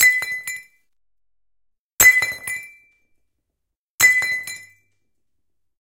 Dropping glass 2
A piece of glass being dropped, bouncing around.
Recorded with:
Zoom H4n on 90° XY Stereo setup
Zoom H4n op 120° XY Stereo setup
Octava MK-012 ORTF Stereo setup
The recordings are in this order.